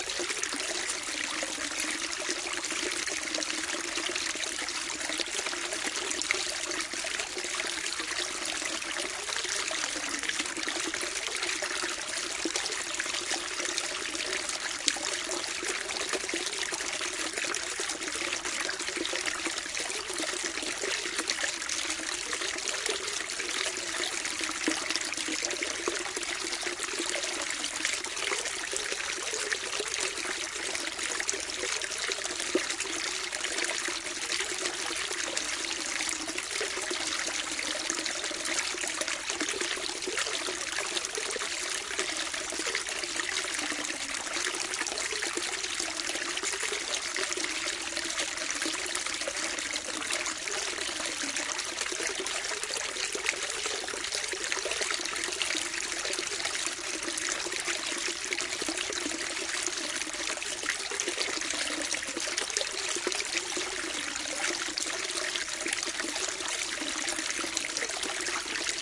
Sound of the overflow of a large fishtank in the botanical garden in Hanover / Germany. Recorded with an Olympus LS-10. Great gurgling sound.
gurgle, water